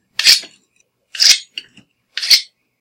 Sword Unsheath
Unsheathing sounds x3.
Made with a dagger i have got at home & its sheath.
Not high quality, but enough for my application.
May be useful to someone.
Battle, Combat, Dagger, Medieval, Ready, Sword, Unsheath, War, Weapon